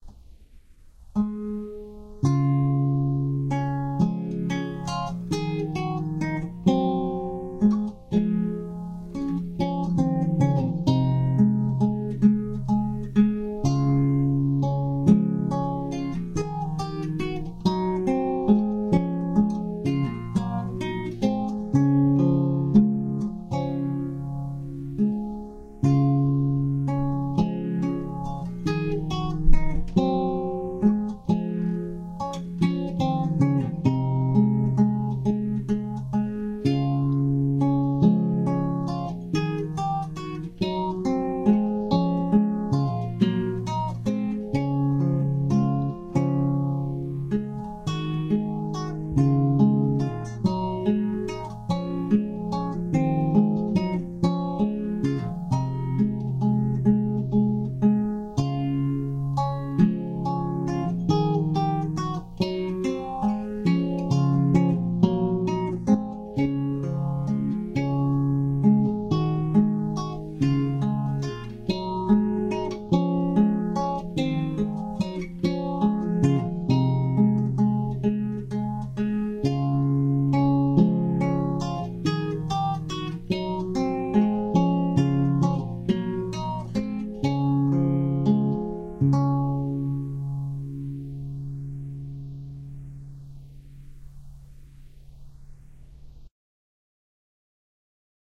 This is one of the first songs I learned on classical guitar. Thanks. :^)
Classical, Fernando, Guitar, Sor